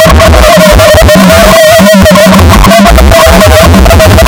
Made by importing misc files into audacity as raw data.
ouch, waow